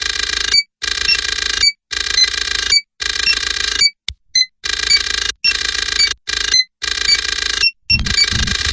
The 8 Bit Gamer collection is a fun chip tune like collection of computer generated sound organized into loops